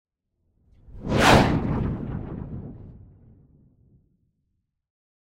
The typical sound heard in space chases when a spaceship passes at high velocity. Can be used for projectiles flying by at high speed too.